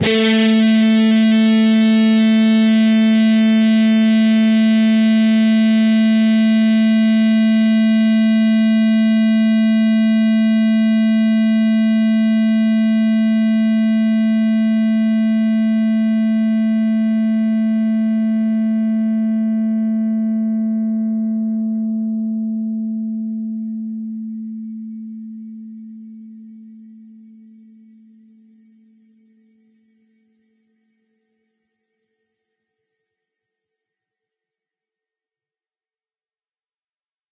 Dist sng A 5th str 12th frt
A (5th) string, on the 12th fret.
distortion, guitar, strings, distorted-guitar, single, guitar-notes, single-notes, distorted